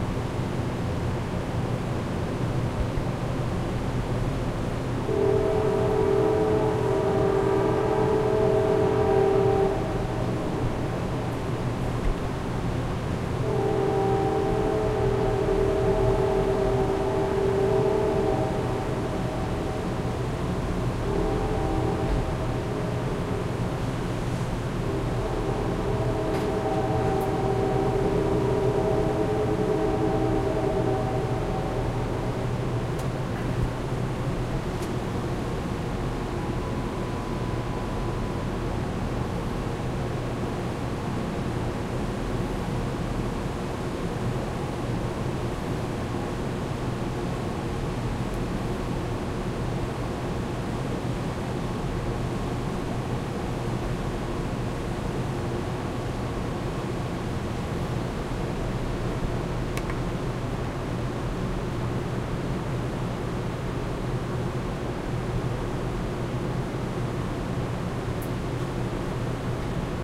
Charlotte NC at Night
This was on the balcony of the 11th floor at an apartment complex in downtown Charlotte, NC called Skyhouse Apartments.
The seldom beeps are not present in the downloaded file.